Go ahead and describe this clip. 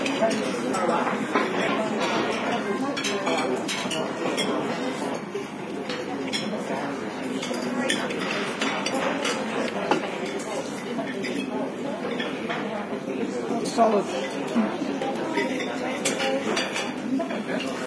Standard Restaurant sounds
food, restuarnd, sounds